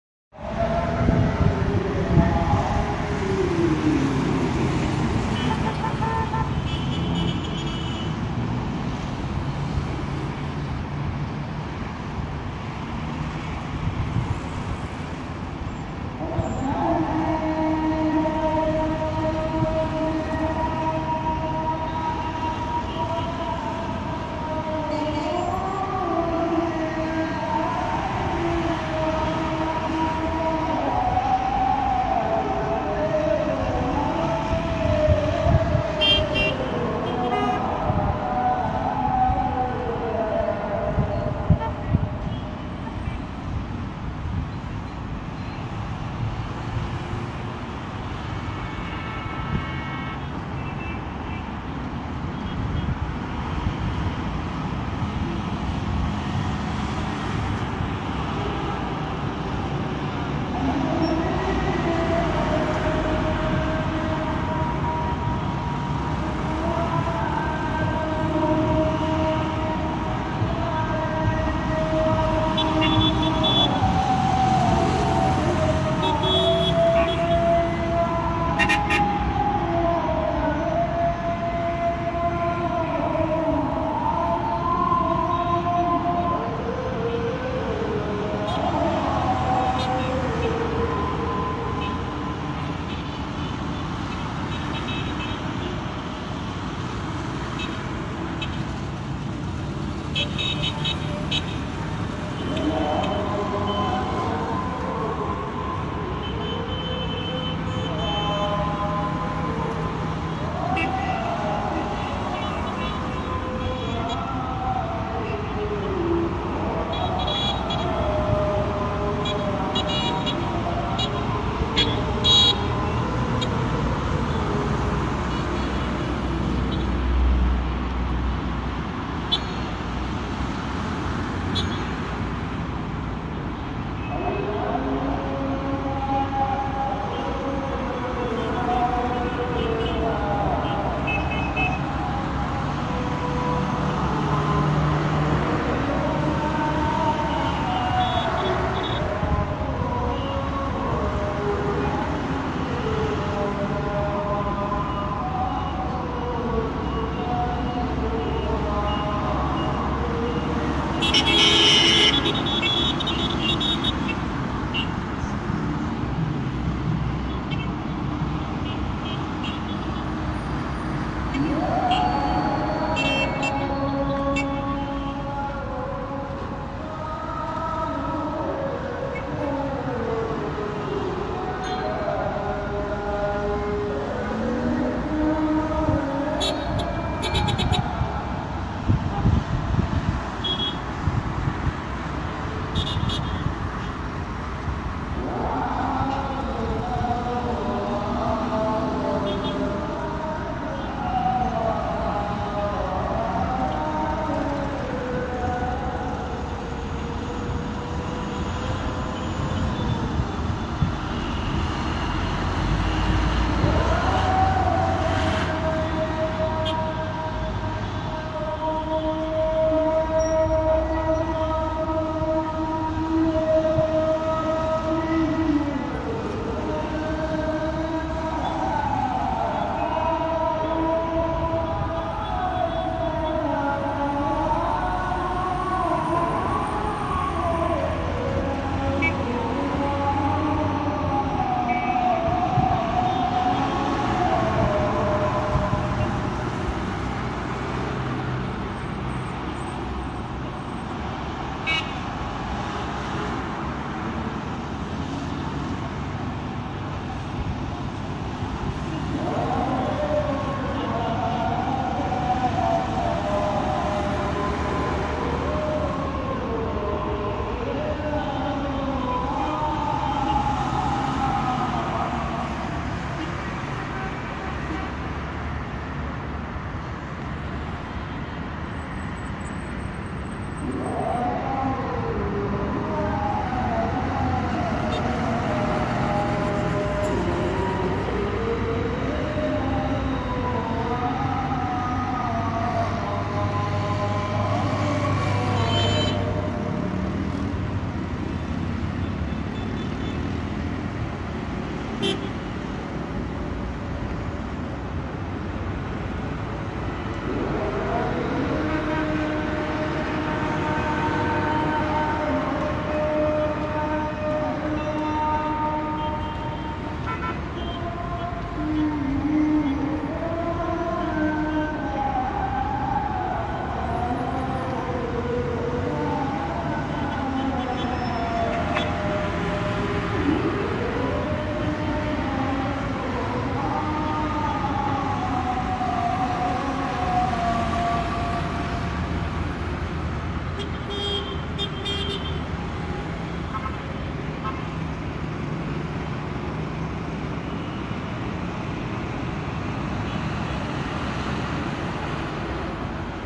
Muezzin on a busy street in Giza (long recording)
A muezzin is singing in the evening in Al Mansoureya Rd, Al Haram, Gizeh, Egypt. The road is full of traffic and many people sound the horn.
Recorded from a roof top.